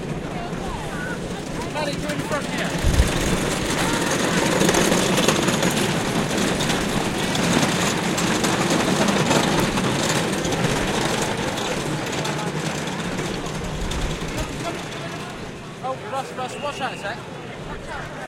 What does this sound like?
lewes Rattling carts roll by
Lewes bonfire night parade, England. Crowds of people dress in historic costumes and burn effigies of the pope and political leaders. Lots of bangs, fireworks going off, chanting, shouting.